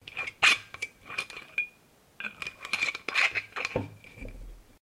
Unscrewing & screwing a wine bottle lid
bottle, screwing